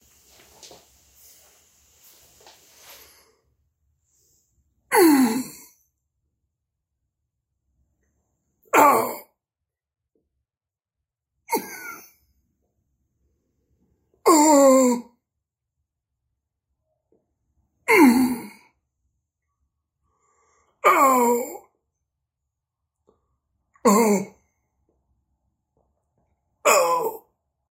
Man dies diffrent sound.